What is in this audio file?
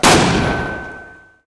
M224 Mortar Firing Close 02
Recorded roughly 15 feet from the source.